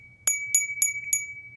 Rang a glass bell for a moment. Recorded with my ZOOM H2N.
bell, ringing